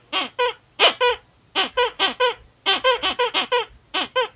Squishy toy sound
A sound of a toy that can be squished many times
This sound should be funny for you
funny, squish, toy